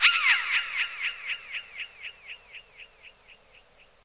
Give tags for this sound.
lough; birdsong; reggae; bird; score; ambient; happy; soundesign; echo; delay; space; reverb; tape; electronic; spring; fx; effect; funny; lol; animal; natural; dub; nightingale; surprise

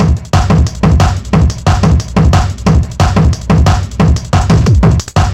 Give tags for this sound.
180; bass; drums; break; drum

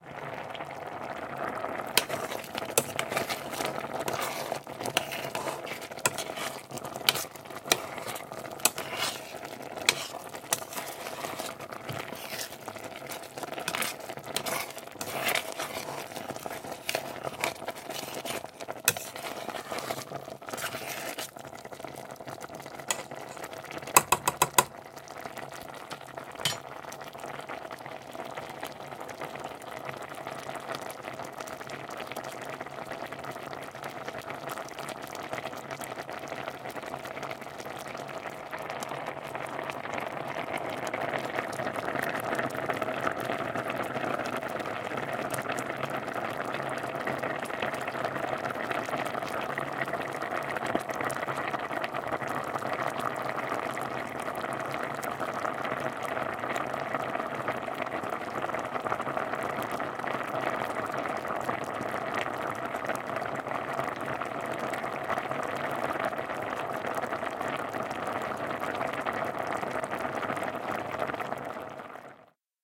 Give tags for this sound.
campfire; bubbling; bubble; drink; food; meal; wok; cooker; kochen; suppe; cook; prepare-food; eat; pan; vegetables; soup; essen; kitchen

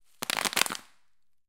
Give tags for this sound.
air-bubble; air-bubbles; bubble-pop; bubble-popping; bubble-wrap; packaging; packing; packing-material; plastic; pop; popped; popping; popping-bubble

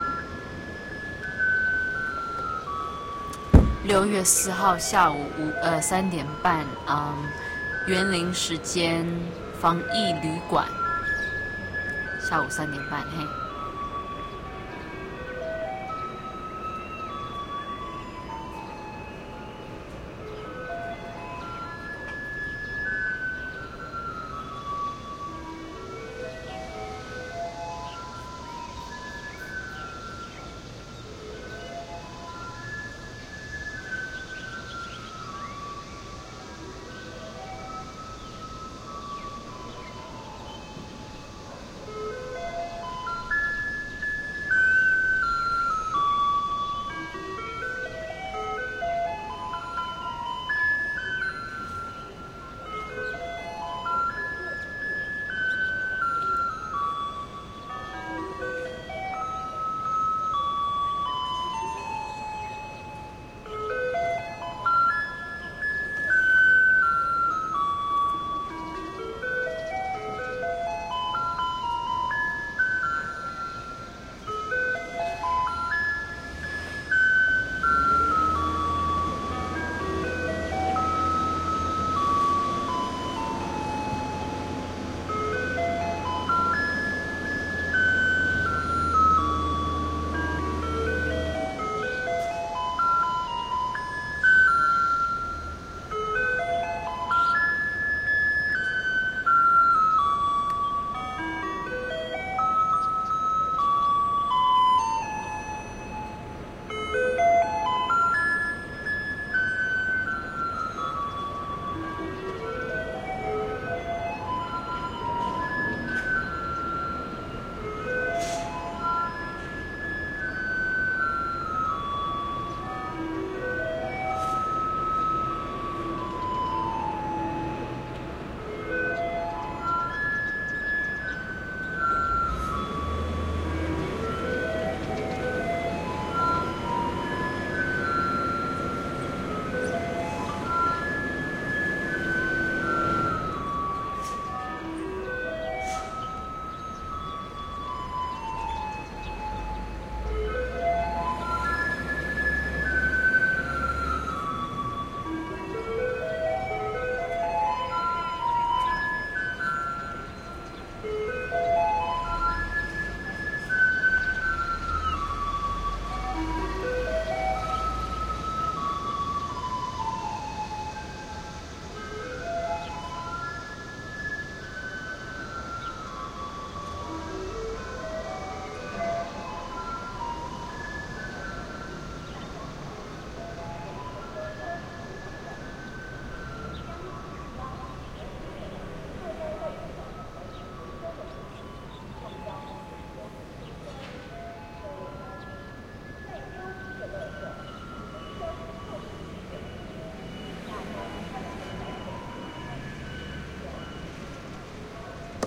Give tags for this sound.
Asia; asia-pacific; City-soundscape; field-recording; Mid-afternoon; street; Taiwan; trash-collector-song; Yuanlin

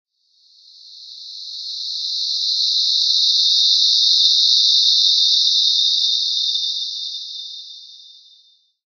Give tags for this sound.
airy; ambient; bright; dark; dirge; pad; soundscape; tension

